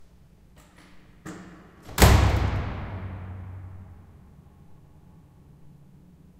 Door Smash 1
Door slamming shut. A heavy door falls closing shut on its own at HTW Berlin.
Recorded with a Zoom H2. Edited with Audacity.
doors, denied, htw-berlin, slamming, berlin, shut, slam, metal, campus, school, shutting, field-recording, echo, bang, door, heavy, gate, banging, close, university, entrance, closing, htw, architecture